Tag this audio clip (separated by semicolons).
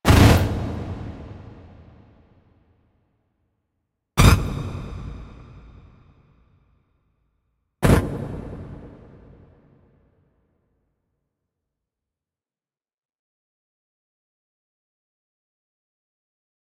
sound; fx; effect; sfx